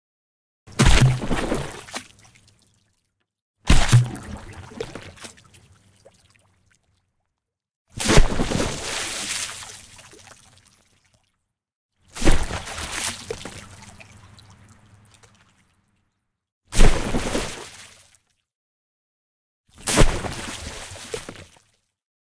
Water Splash Objects falling
Objects hitting the surface of water and causing a splash.Recorded with Minidisk, layered and mastered in Logic 7 Pro, EQ, Stereo Spreader and Sub Bass